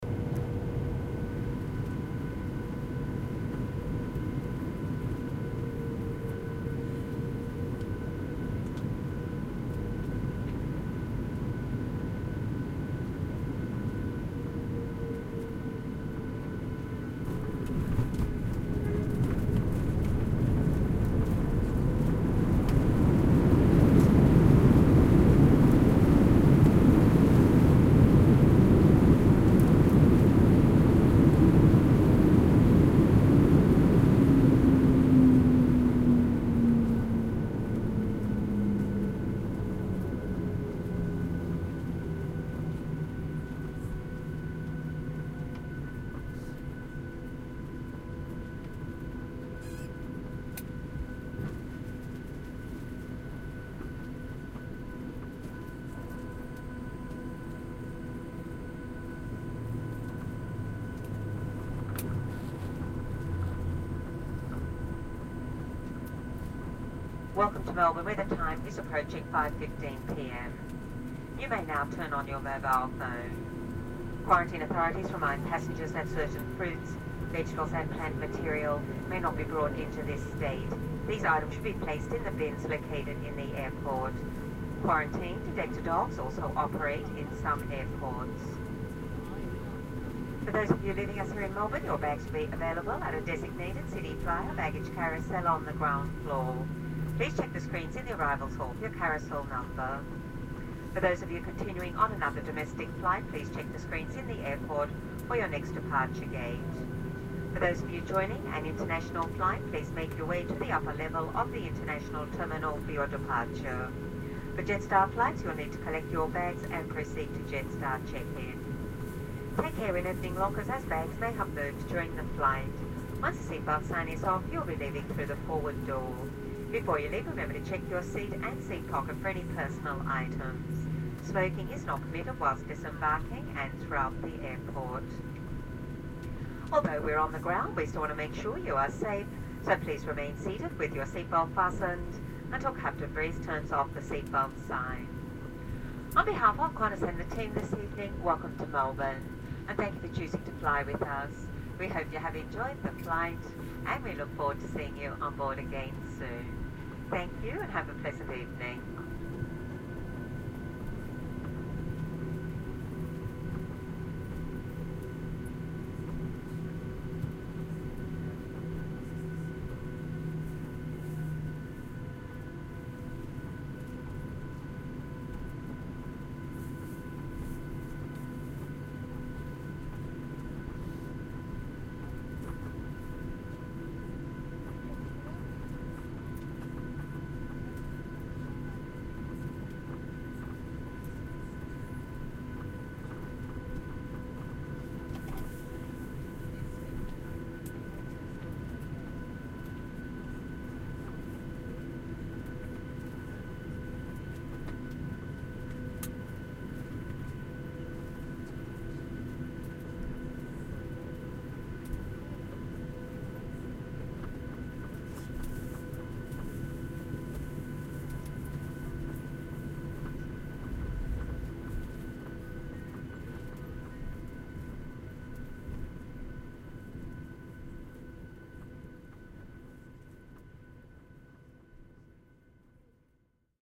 Landing sounds and Taxi messages
Plane lands, hostess gives the speech (1:07 - 2:44). Recording - Edirol R-09 internal mics. Fortunately they don't screen for nerds on planes (yet!
aircraft, cabin-noise, jet, aeroplane, field-recording, machines, plane, airplane, ambience